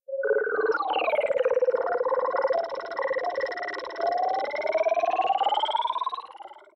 Bug-Robot Hybrid
Made from a female vocalization modulated with synth through a vocoder.
bug, creatures, sci-fi